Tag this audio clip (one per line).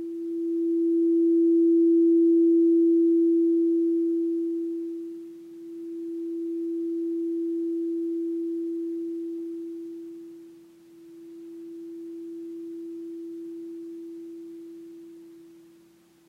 crystal
healing